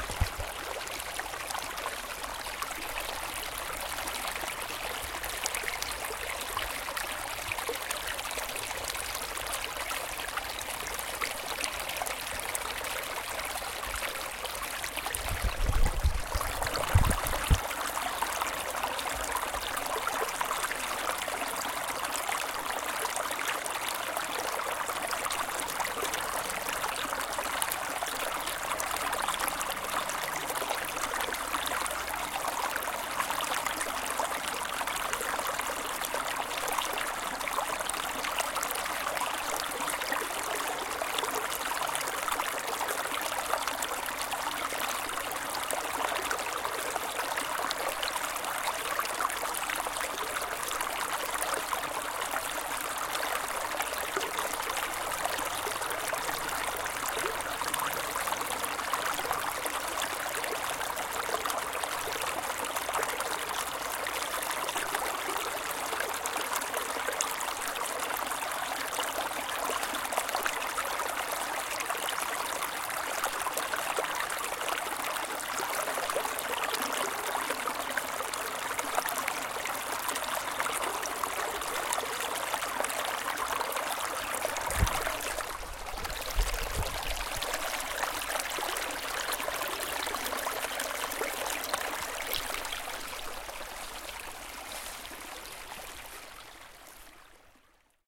Running Water
A calm small river of water running.
This record was taken in the French Mountains, during the beginning of the spring.
relaxing, out, ambience, field-recording, river, flow, nature, calm, flowing, water, brook, peacefull, liquid, running, ambient, stream, trickle